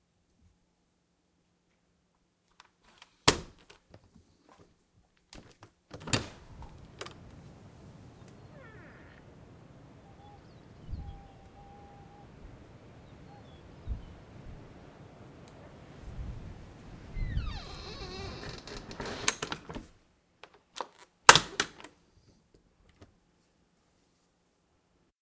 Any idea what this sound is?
Full sequence of sounds from unlocking door and deadbolt, opening the door, hearing the wind and birds outside, then closing and locking the door again.